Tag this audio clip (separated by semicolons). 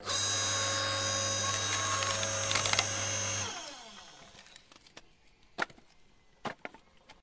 split; break; cutting; industrial; splitting; log; crack; machinery; stereo; wood